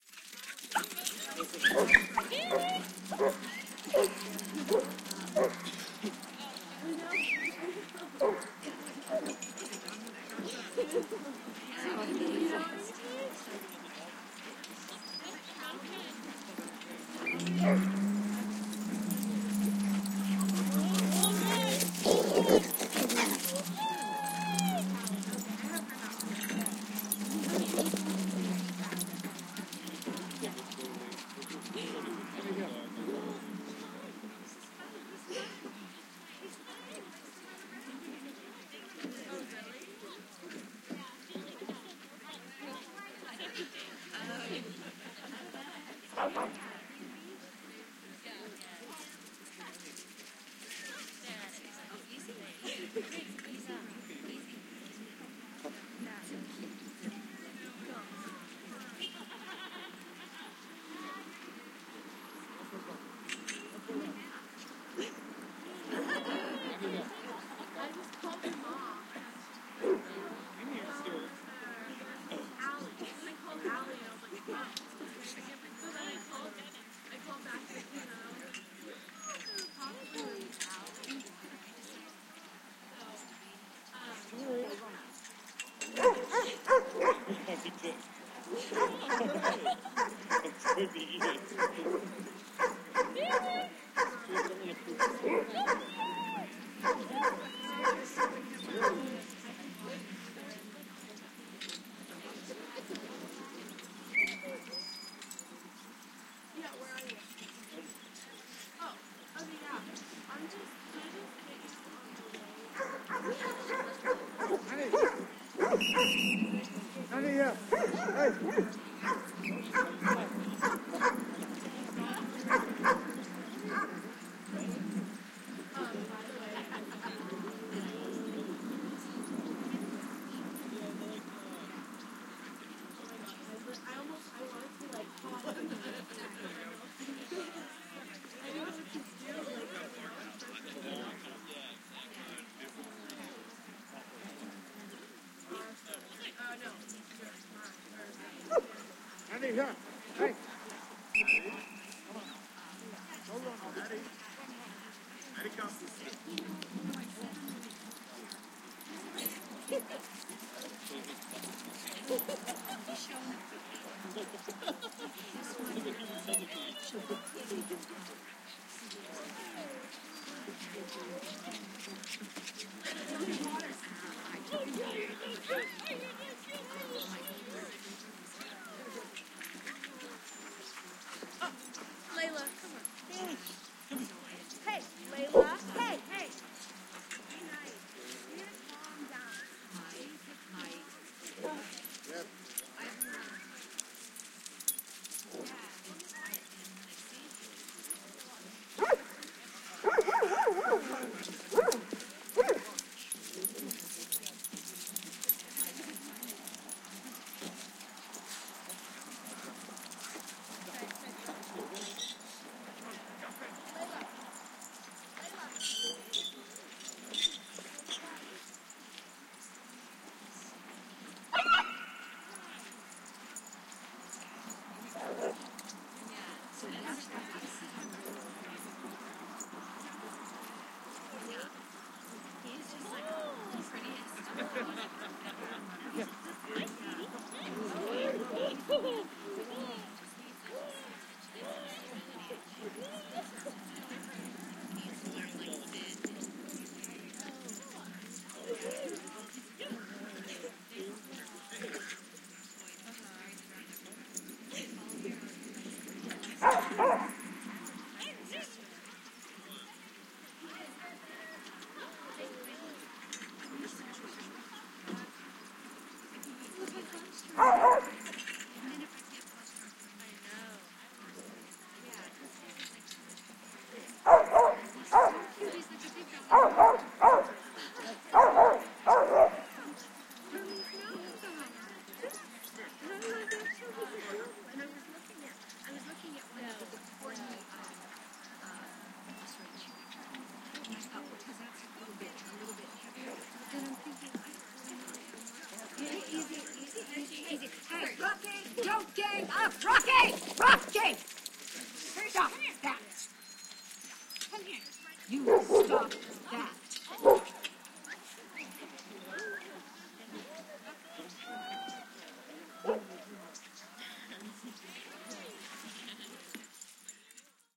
AMB S Dog-Park Busy 001
I set up my recorder in multiple areas of a busy dog park in Los Angeles. Lots of barking, playing, and general dog and owner sounds.
Recorded with: Sound Devices 702t, Beyer Dynamic MC930 mics.
park, walla, ambiance, people, playing, barking, bark, city